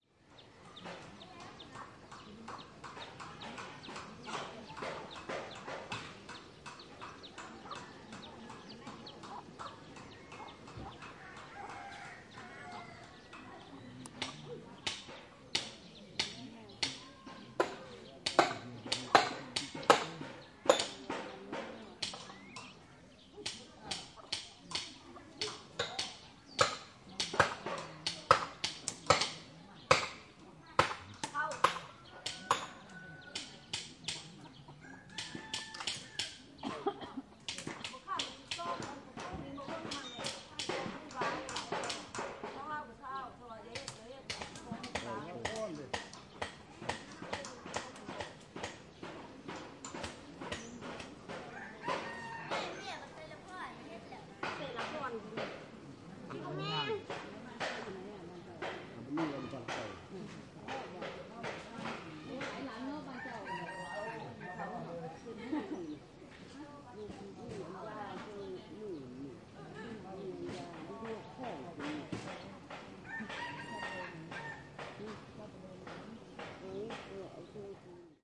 Hoybo village (Minority village), Muang Ngoi Neua, Luang Prabang Province, Lao.
12 o'clock in the morning in Hoybo village, a laotian dorp close to Muang Ngoi, in Luang Prabang province. Conversations between people, children playing, hammering, sound from animals...
Recorded with zoom h2
animals, birds, builders, chicken, constructing, construction, country, countryside, ethnic, field-recording, hammer, hammering, jungle, Lao, Laotian, minority, rooster, village, work, worker, working